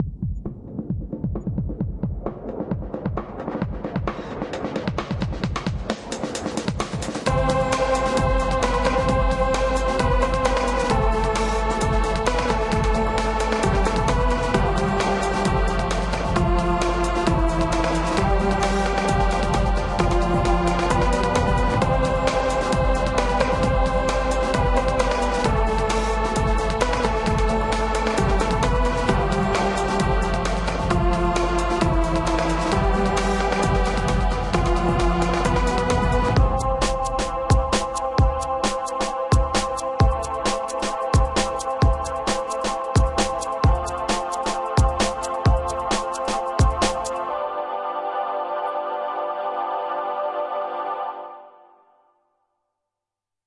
Vodka & Drum Loops 132BPM
vodka + drum loops = this track. 132 BPM, Enjoy!
BPM, 132, Snickerdoodle, Vodka, Apple-Loops, Electronic-Music, Drum-Loops